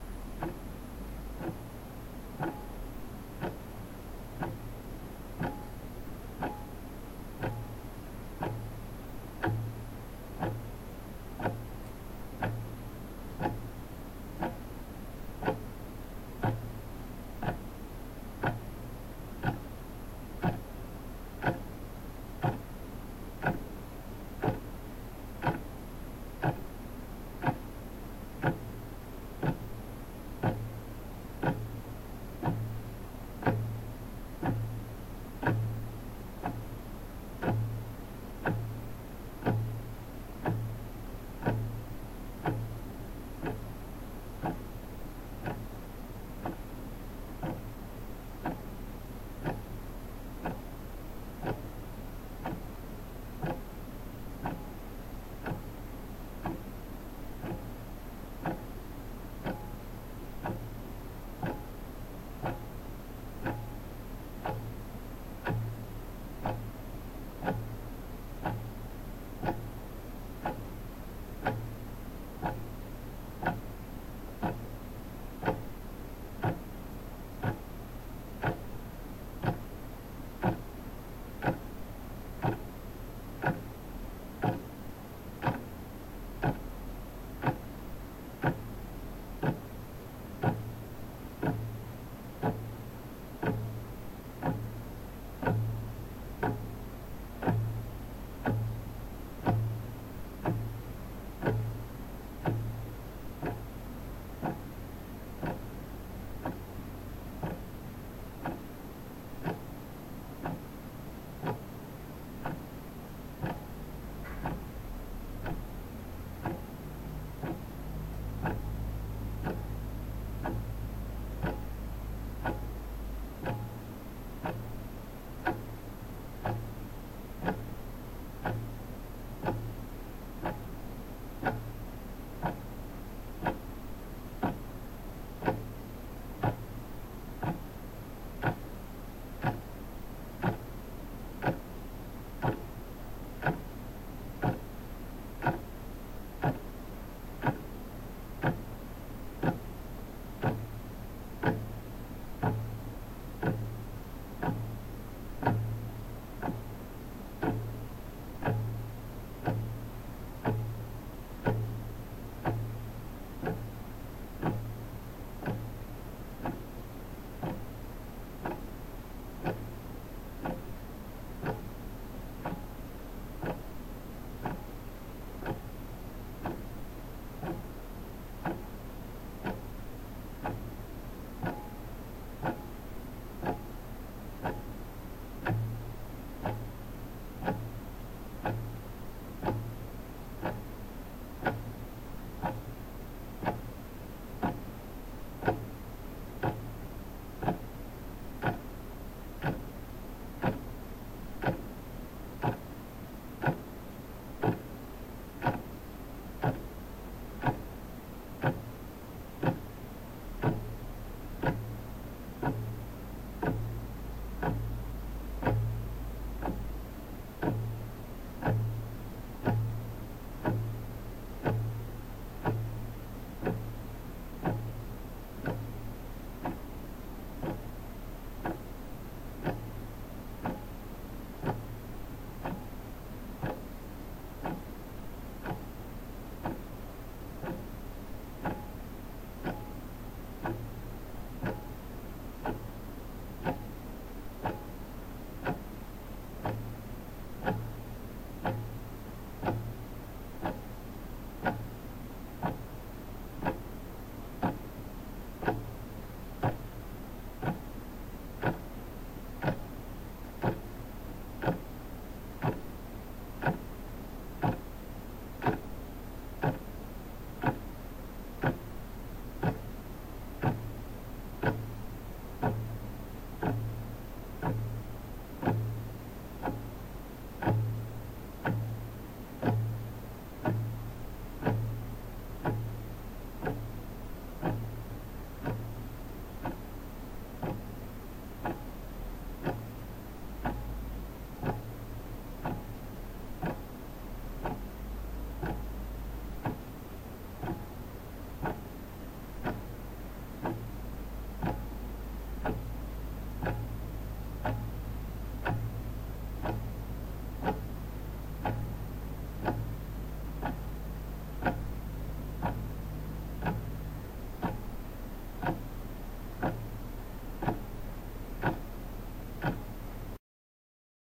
Timex analog office clock, front perspective, muted ticks
Recorded in mono 44.1/16 from an Earthworks SR69 into a Zoom H4n, trimmed with a bit of gain boost and a bit of noise filtering EQ